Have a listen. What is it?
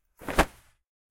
Jacket/Cloth Rustle 5
One of many recordings of me waving my jacket around in a soundproofed room.
air, cape, cloth, clothes, coat, feathers, flap, fly, jacket, jump, rustle, whip, whoosh, wind, woosh